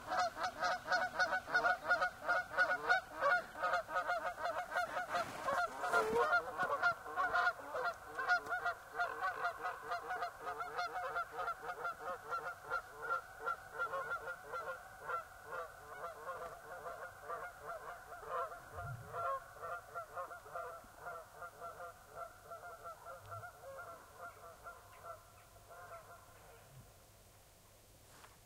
Quick recording of some geese flying overhead. (apologies for the foot shuffling in the snow midway.)
If my sounds have been useful, you can support me and receive a 1.6GB collection of recordings.